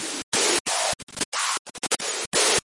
Processed sequence of noise. With panning, amplitude, filter and gate modulation.